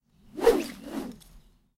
Raw audio of me swinging bamboo close to the recorder. I originally recorded these for use in a video game. The 'D' swings make a full 360-degree circle.
An example of how you might credit is by putting this in the description/credits:
The sound was recorded using a "H1 Zoom recorder" on 18th February 2017.